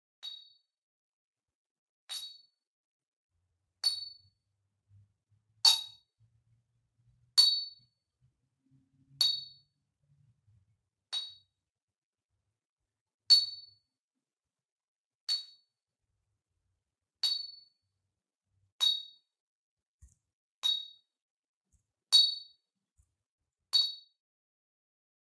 18. Choque contra superficie metálica
metallic, hit, ding
ding, metallic